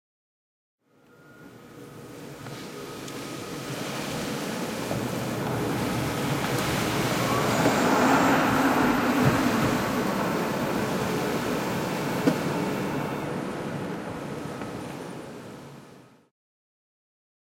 MITSUBISHI IMIEV electric car ACCELERATE into pass by
electric car ACCELERATE
ACCELERATE, car, electric